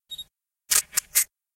a quick shutter snap from an Olympus camera